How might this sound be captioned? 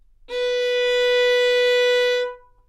Part of the Good-sounds dataset of monophonic instrumental sounds.
instrument::violin
note::B
octave::4
midi note::59
good-sounds-id::3620
B4, good-sounds, multisample, neumann-U87, single-note, violin